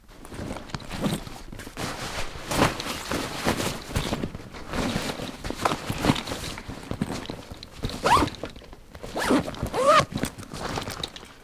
clothes into rucksack and zip up 001
Some clothes being quickly stuffed into a rucksack, then zipped up.